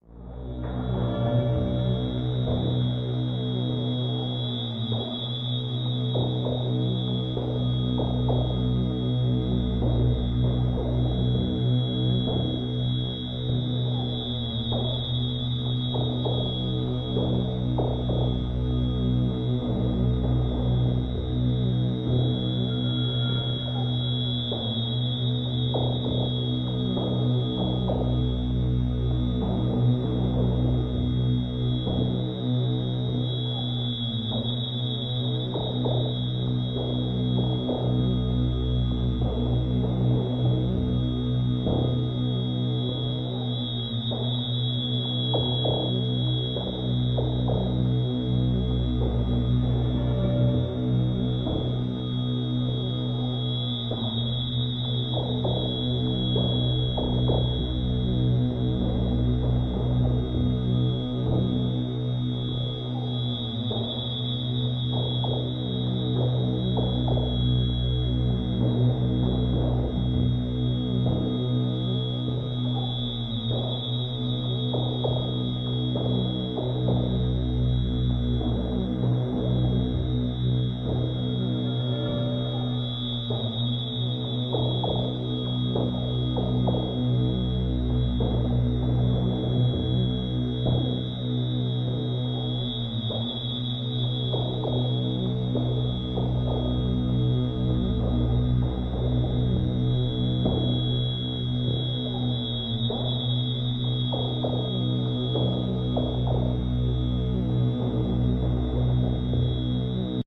Dark Drone 2
A spooky synth drone for ambience. Long enough to be cut down to a desired length, but simple enough that you could probably find a good looping point if you need it longer.
Ambience, atmosphere, background, bass, cinematic, dark, deep, digital, drone, electronic, horror, melodic, music, musical, rhythmic, sci-fi, soundtrack, spooky, stab, sting, suspense, synth, synthesized, synthesizer